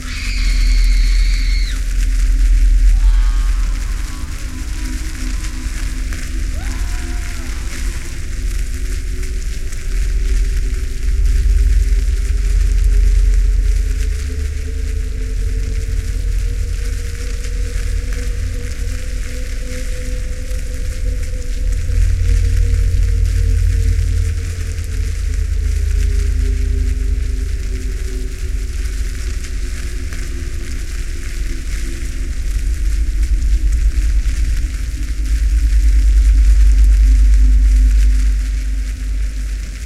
Hell the "center of earth"
fire, wind, hell, earth, water